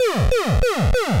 A couple of "pew"s. Could be used in a pinball game. Created using SFXR
8-bit, 8bit, arcade, chip, computer, game, pinball, retro, robot, sfx, sfxr, videogame